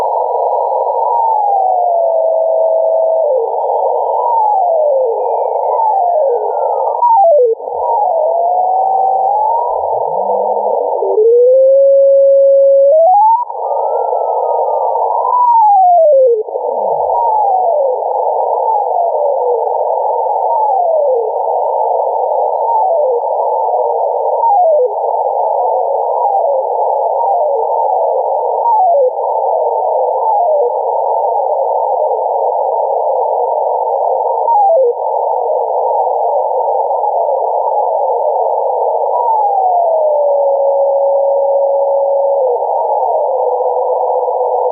SDR Recording 004
Recorded radio scanning noise.
abstract, digital, noise, radio, electric, freaky, sci-fi, electronic, space, scane, ambient